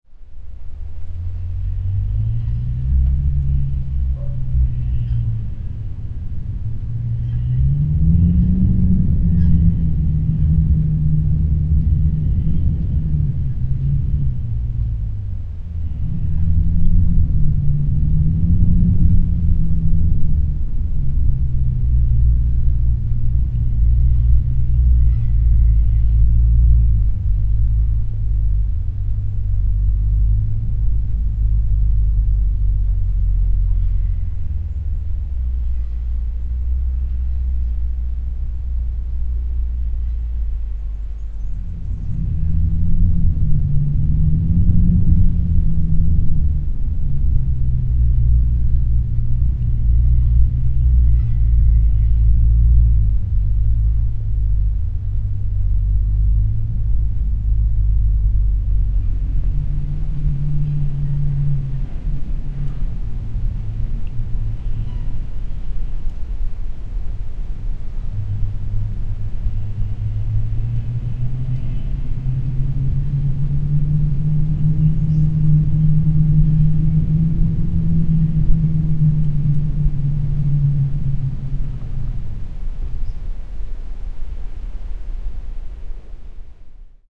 Spooky Wind #1
A ghostly wind.
It's not the perfect recording but is pretty good. There is a distant dog barking at the beginning and there is some mechanical sounds in the distance. Have a listen, you may not hear them.
barking, blowing, creepy, dog, eerie, ghost, ghostly, gothic, haunted, horror, howling, scary, sinister, spooky, wind, windy